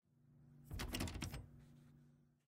Wooden Door Handle Jiggle
Someone trying to open a door
folly, wooden, metal